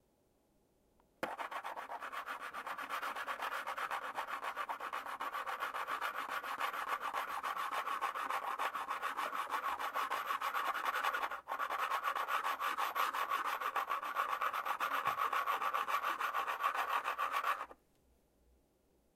mySound Piramide Mahdi
Sounds from objects that are beloved to the participant pupils at the Piramide school, Ghent. The source of the sounds has to be guessed.
bottle-on-table, mySound-Mahdi